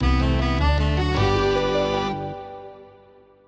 Level win
When you complete the level.
The sound is created with Reason 4.0 and m-audio midi controller, recorded with Reason 4.0. It is made in 16 dec. 2014 in Estonia.
victory, game